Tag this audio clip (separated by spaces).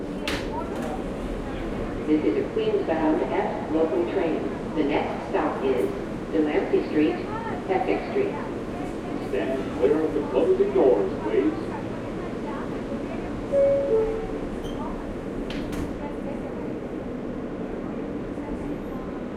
field-recording H4n MTA NYC subway Zoom